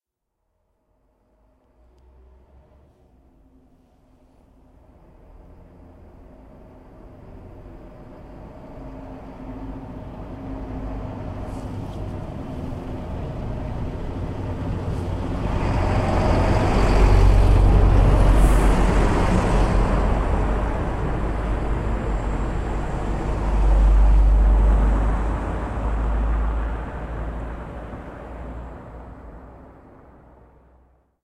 Vehicle passing - driving car - lorry - van - bus - tractor - truck
Vehicle passing
Recorded and processed in Audacity
car, cars, drive, driving, field-recording, lorry, motor, passing, traffic, van, vehicle